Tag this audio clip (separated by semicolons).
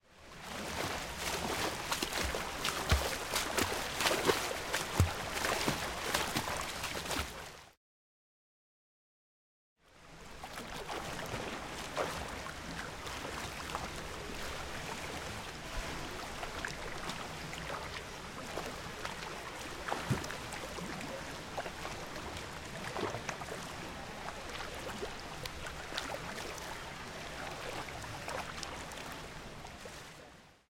Panska; Swimming; Czech; CZ; Pool